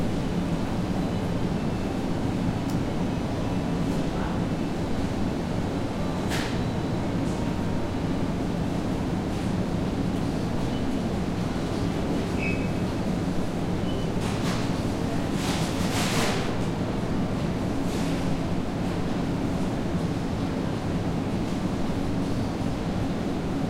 Ica Maxi, frysdiskar
The sound of some freezers at the local food store.
affair, freezer